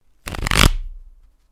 D3 cards mixing
mixing the cards before a card game